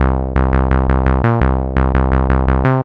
A midi sequence created from a protein and DNA sequence using and built-in general MIDI laptop synth. Mastered in cool edit. Tempo indicated in tags and file name if known.
loop, world, 85, bpm, synth, dna